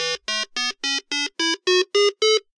merlin tone scale
An 8 tone scale (plus an extra 'so' at the beginning) from a 1978 hand-held 'Merlin' electronic game. Recorded from the built-in speaker with a CAD GXL1200 pencil mic through an ART USB preamp.
electronic-game, Merlin